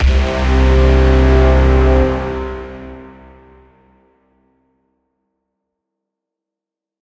braaaam7 push tg resonance
A collection of "BRAAAMs" I made the other day. No samples at all were used, it's all NI Kontakt stock Brass / NI Massive / Sonivox Orchestral Companion Strings stacked and run through various plugins. Most of the BRAAAMs are simply C notes (plus octaves).
arrival, battle, braaam, brass, cinematic, dramatic, epic, fanfare, film, heroic, hit, hollywood, inception, movie, mysterious, orchestral, rap, scifi, soundtrack, strings, suspense, tension, trailer